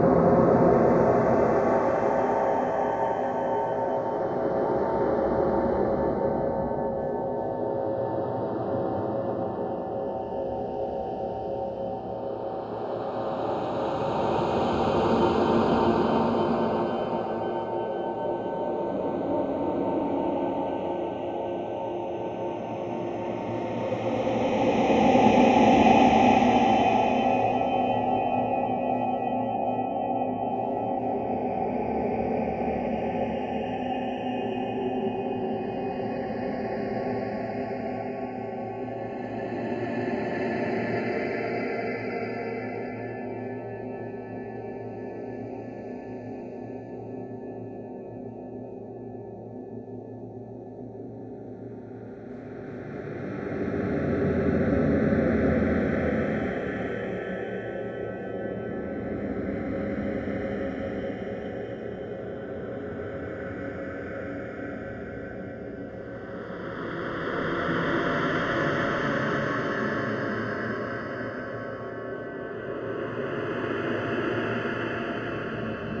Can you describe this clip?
sons modificados 3
nature chicken resonator time-stretch pitch-shift sound-processing sound-effect
chicken, nature, pitch-shift, resonator, sound-effect, sound-processing, time-stretch